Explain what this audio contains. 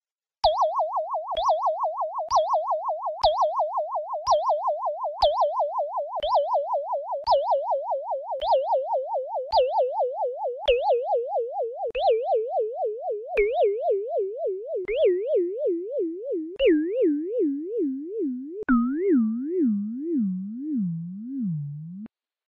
space marker buoy 1
space, laser, computer, ship, aliens, weird